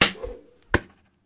Crossbow Firing and Hitting Target
Sound of crossbow firing and impacting its target. Combination of samples from this pack. Originally recorded these for a University project, but thought they could be of some use to someone.
arrow, bow-and-arrow, cross-bow, crossbow, foley, hit, impact, shooting, shot, swish, swoosh, target, video-game, videogame, weapon, whoosh